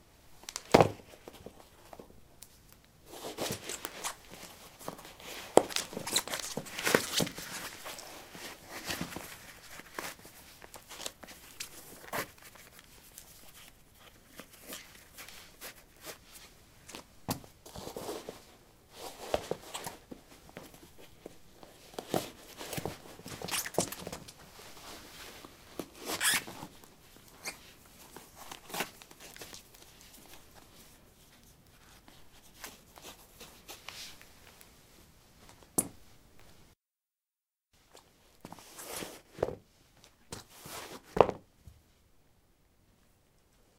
concrete 12d squeakysportshoes onoff
Putting squeaky sport shoes on/off on concrete. Recorded with a ZOOM H2 in a basement of a house, normalized with Audacity.
footstep, footsteps, step, steps